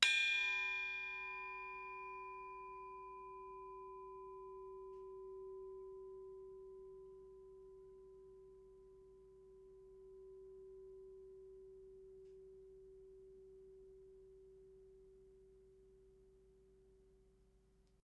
Heatsink Large - 01 - Audio - big heatsink
Various samples of a large and small heatsink being hit. Some computer noise and appended silences (due to a batch export).
bell, heatsink, hit, ring